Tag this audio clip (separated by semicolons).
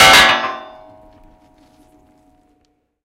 hit; loud; metal